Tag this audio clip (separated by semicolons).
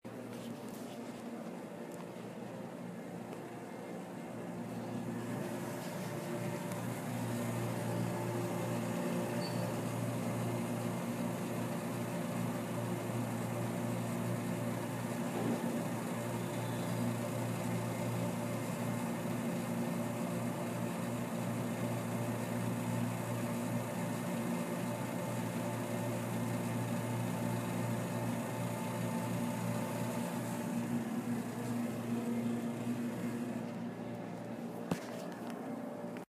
whirring; machine; vending